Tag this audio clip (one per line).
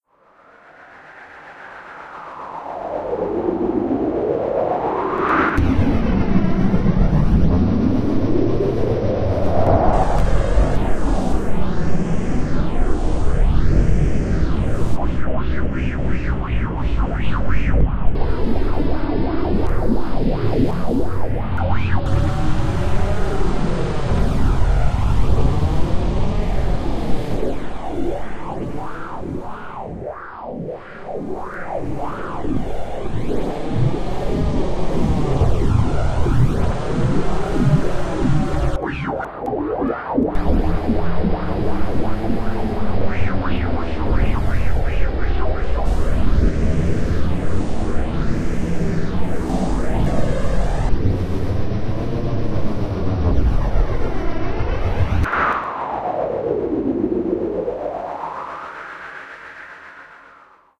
Background
dub
effect
FX
noise
special
step
Sweep
White